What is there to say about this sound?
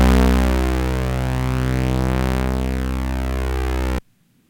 A single note played on a Minibrute synthesizer.